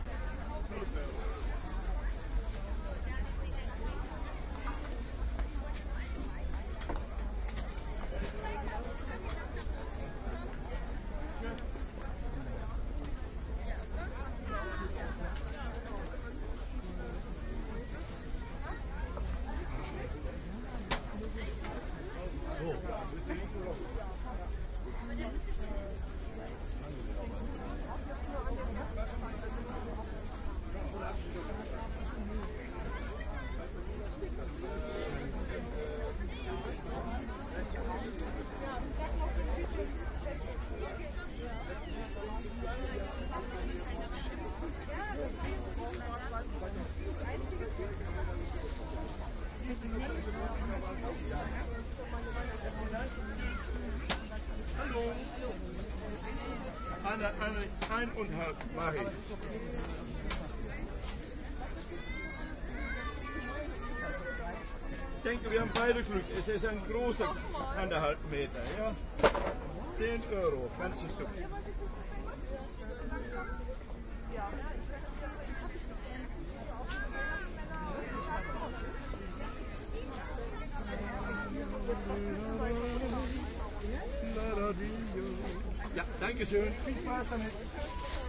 Now three times a year the dutch "Stoffenspektakel" hits Germany with a big variety of material to buy. Some crowd sound, very binaural and the happy shopkeeper. Soundman OKMs and Sharp Minidisk MD-DR 470H.